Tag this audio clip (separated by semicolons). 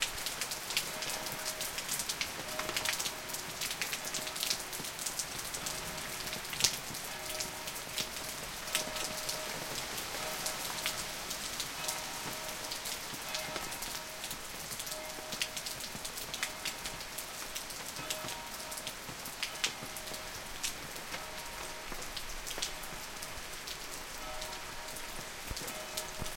rain; bells